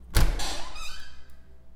porta abrindo 2
Door opening inside an empty building.
open, reverb, wood, door, lock